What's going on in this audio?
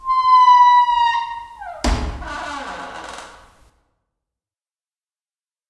Door creaking 04 with Reverb
close, closing, clunk, creak, creaking, creaky, door, handle, hinge, hinges, lock, open, opening, rusty, shut, slam, slamming, squeak, squeaking, squeaky, wood, wooden